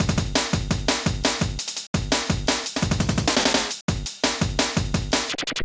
Glitch looped drum pattern made by digital tracker.